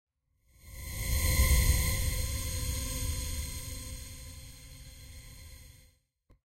cinematic, mid, uplift, processed, soundhack, phasevocoding, granular, maxmsp, live
cinematic, granular, soundhack